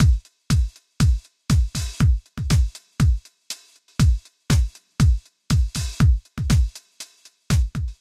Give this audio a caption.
A collection of sounds created with Electribe MX1 in Vemberaudio Shortcircuit, some processing to get Toms & Hats, and a master multiband limiter to avoid peaks.
Col.leció de sons creats amb una Electribe MX1 samplejats i mapejats en Vemberaudio Shortcircuit, on han sigut processats per obtenir Toms, Hats i altres sons que no caben dins dels 9. Per evitar pics de nivell s'ha aplicat un compressor multibanda suau i s'ha afegit una lleugera reverb (Jb Omniverb) per suavitzar altres sons.
Enjoy these sounds and please tell me if you like them.
Disfrutad usando éstos sonidos, si os gustan me gustará saberlo.
Disfruteu fent servir aquests sons, si us agraden m'agradarà saber-ho.